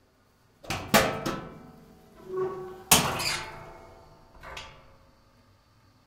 metal door boiler 01
Stereo recording of an opening and closing a metal door of a gas boiler. Quiet gas boiler noise in background. Metal squeaking sound. Recorded with Sony PCM-D50, built-in mics.
door, squeaking, closing, mechanical, house, opening, metal, unprocessed, binaural, machine, noise, boiler-room